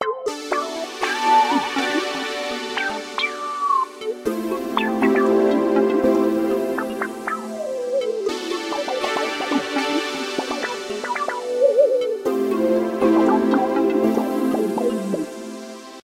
Racing game menu music - while buying fancy cars we will never have!
Little song loop made with Garage Band.
Use it everywhere, no credits or anything boring like that needed!
I would just love to know if you used it somewhere in the comments!
classy, game, idle, menu, music, pause, racing, theme